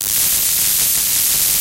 own recorded;)
i will use it in 1 of my hardstyle tracks. you wil find it soon here: